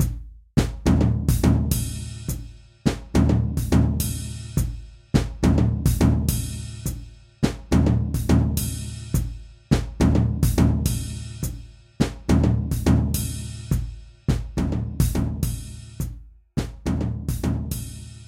Drum-loop-2-Tanya v
drum-loop, drums, loop